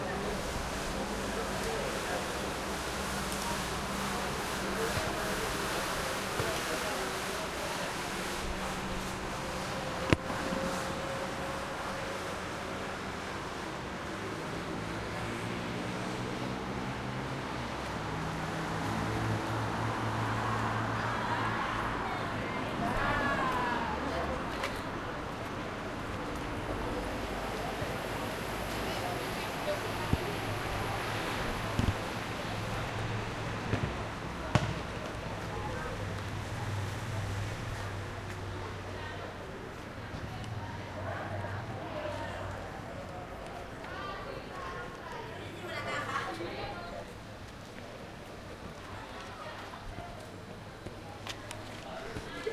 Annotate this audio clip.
collab-20220510 PistesRoquetes Transit Humans Football Nice Complex
Urban Ambience Recording in collab with La Guineueta High School, Barcelona, April-May 2022. Using a Zoom H-1 Recorder.
Complex, Football, Humans, Nice, Transit